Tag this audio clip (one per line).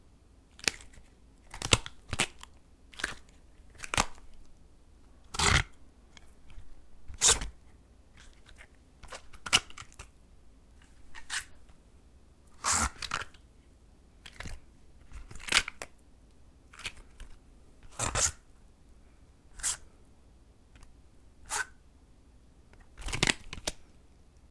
creak
foley
handle
mask
rubber
silicone
slip
squeak
stretch
water